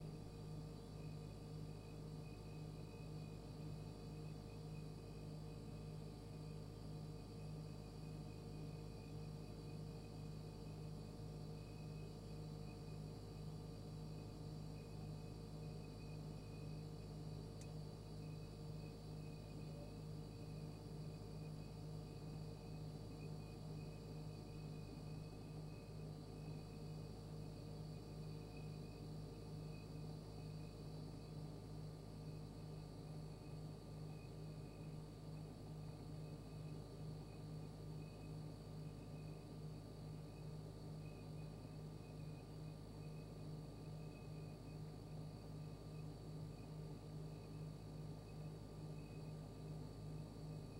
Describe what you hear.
This is the refrigerator at Min Min’s house. It has a slight tingling sound in there, I've noticed.
Recorded with Zoom H5 recorder (XYH-5)
October 22, 2018